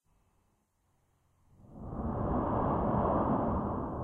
I edited my own breath to create this large dragon breath sound.
breath, breathe, dragon, low-freq, snore